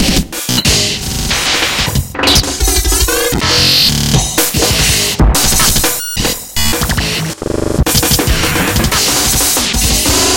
BR sequenced [guitar] (4)
One of the sequenced sections of percussion, unaccompanied
fast, beats